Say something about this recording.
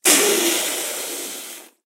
Blood Spurt2
Sword noises made from coat hangers, household cutlery and other weird objects.
War, Action, Fight, Foley, Battle